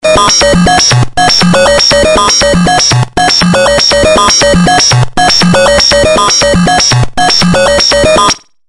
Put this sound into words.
techno 2 120bpm
The developers gave no explanation to its users and continued to sell the non-working app and make other apps as well. These are the sounds I recorded before it was inoperable and the source patches seem to be lost forever.